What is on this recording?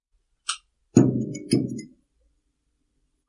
Fluorescent lamp start 8
fluorescent tube light starts up in my office. Done with Rode Podcaster edited with Adobe Soundbooth on January 2012
fluorescent, light, office, start, switch